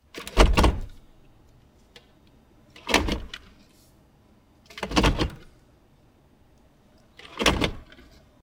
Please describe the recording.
Vintage Cadillac Shift Stick
cadillac, car, shift